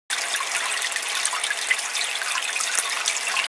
JBF Water through Fish Filter2
water fish filter